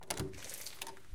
A door is open in wet weather